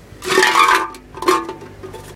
Rolling Can 32
Sounds made by rolling cans of various sizes and types along a concrete surface.